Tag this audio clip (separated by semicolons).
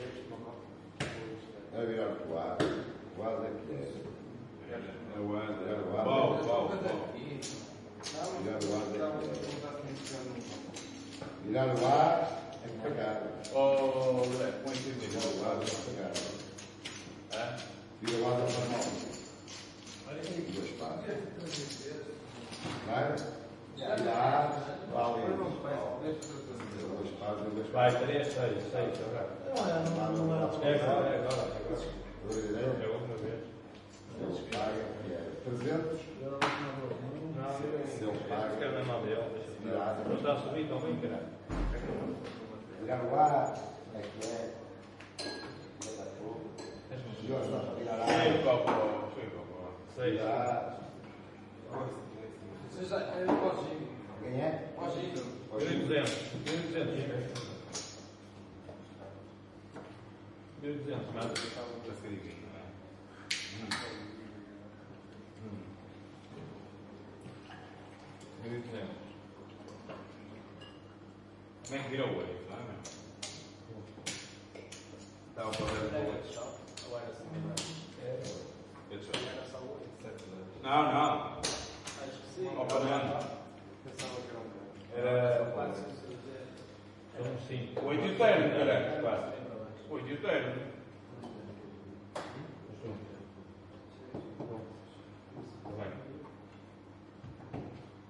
poker; int; ambience; night; ambient; AMB; field-recording; interior; atmosphere; background; Ms; coffe